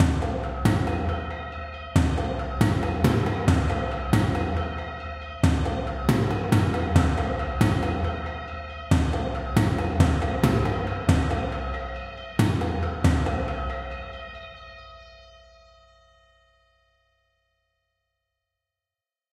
Big rythmic toms with bell-like resonances
energetic, cyborg, drums, dramatic